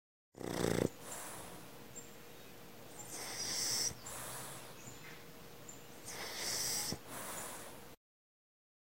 tobby ronquido
funny pug sleep